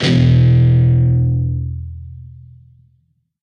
Dist Chr E rock pm
E (4th) string open, A (5th) string 2nd fret. Down strum. Palm muted.